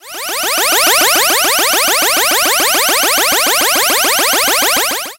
Bonus notify/laser
Sounds like something from an early 1980's Namco arcade game.
Created using Chiptone by clicking the randomize button.